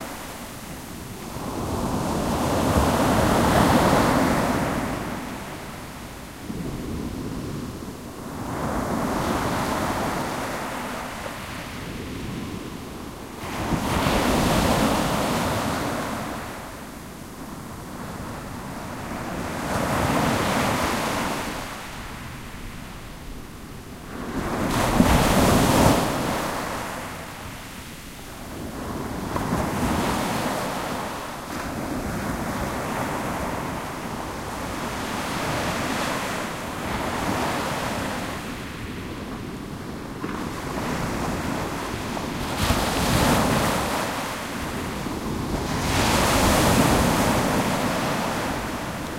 The sound of waves on a sand beach in Brittany. Recorded by me on a Tascam DR-05.